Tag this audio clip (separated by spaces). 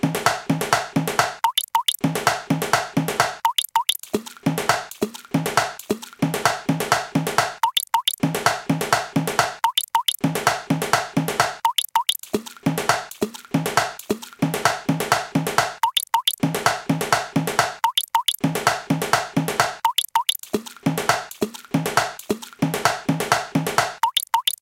percussion loop water rain drop bubble drum